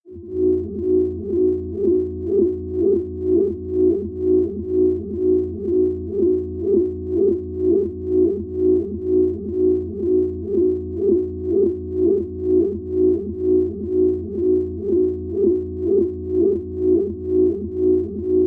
created in ableton with time warp utility and some aditional efects
hum, noise, lfo